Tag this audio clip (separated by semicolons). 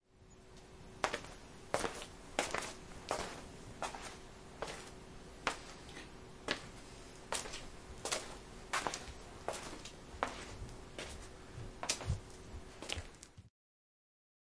walking; footsteps